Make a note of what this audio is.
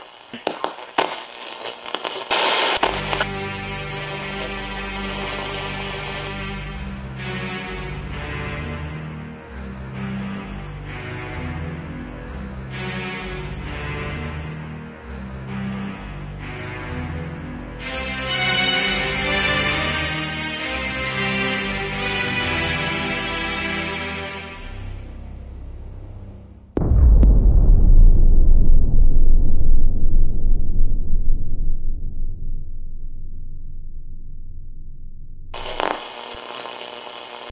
onegun of love
future, sounds, space, star, SUN